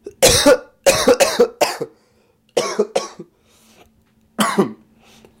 Coughing Young Man (me)
I really had to cough. Recorded with Edirol R-1 & Sennheiser ME66.
ziek, man, growl, verkouden, throat, cold, vocal, guy, ill, male, sick, cough, verkoudheid, coughing, puke, puking, voice